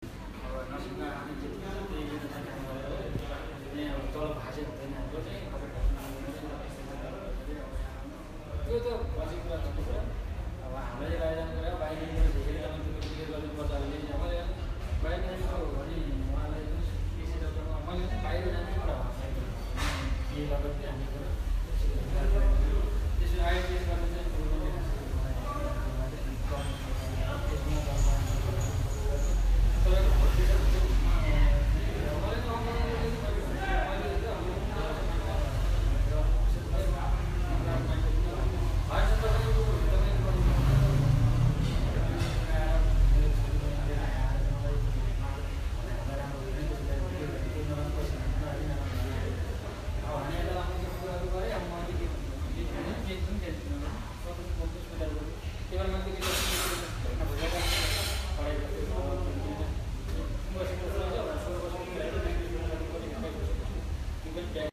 Atmosphere Hotel Nepal
People chatting in a lobby of low budget Nepalese hostel
lobby, Nepalese, indistinct, chatter